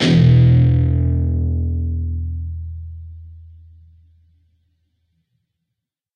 Dist Chr E5th pm
Standard E 5th chord. E (6th) string open, A (5th) string 2nd fret, D (4th) string, 2nd fret. Down strum. Palm muted.